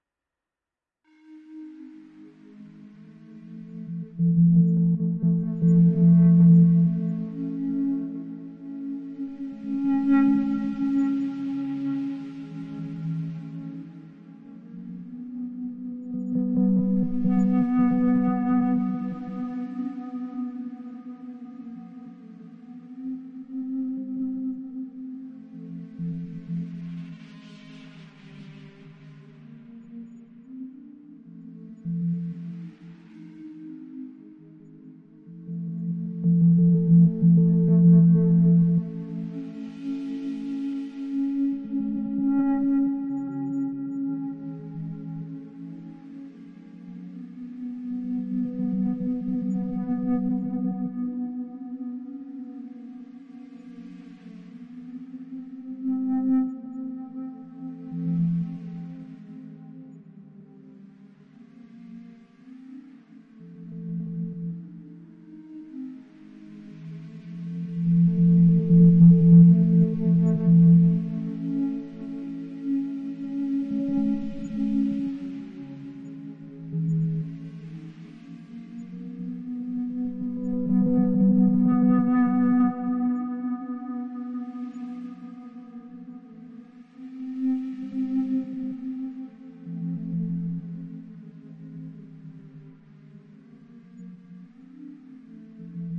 Slow World Relax
Sheet music based on spooky and dark tones. From these came the ambient sound installation vision.
SFX conversion Edited: Adobe + FXs + Mastered
Music
Soundscape, Ambient, World, Strange, Horror, Wind, Ambiance, Drone, Thriller, Fantasy, Amb, Chill, SFX, Eerie, Film, Slow